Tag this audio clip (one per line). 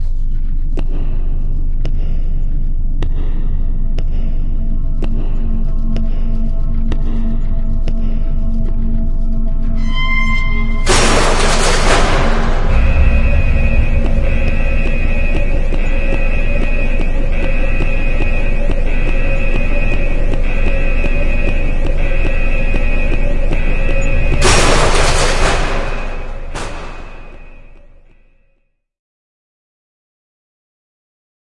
ambience
soundscape